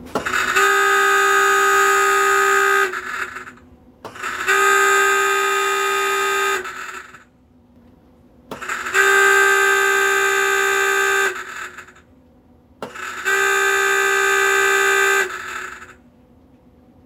Submarine submersion alarm mono wave
Submarine submersion alarm recorded in Brazilian old submarine.
Submersionalarm, Alarm